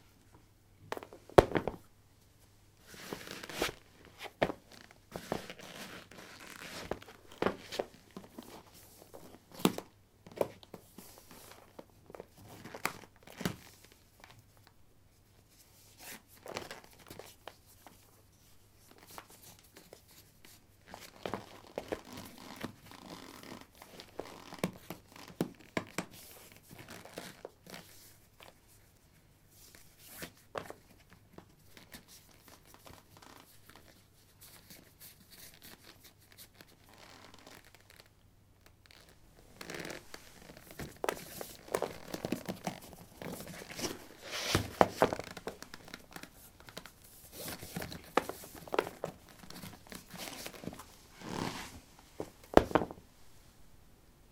Putting trekking boots on/off on linoleum. Recorded with a ZOOM H2 in a basement of a house, normalized with Audacity.